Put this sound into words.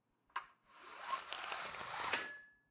Blinds Closing
Shutting blinds, ping stop.
blinds, closing, ping, sliding